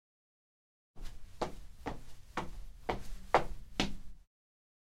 12 pasos madera
pasos de zapatos sobre madera